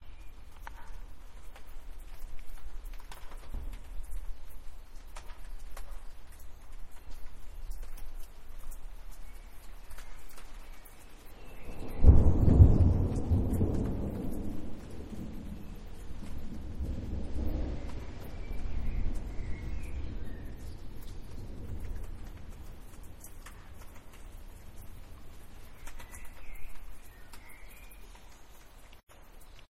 Thunder Roll.
singing, Thunder